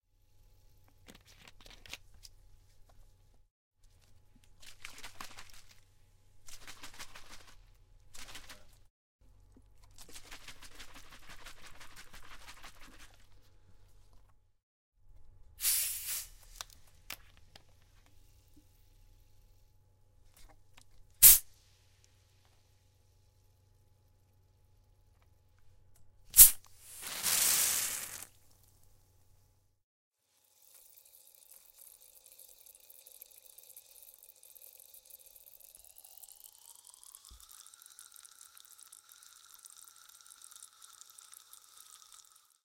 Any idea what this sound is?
Soda Water Bottle
shaking a bottle of soda water and opening it with a fizzling noise